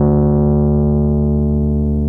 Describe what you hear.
just the single note. no effect.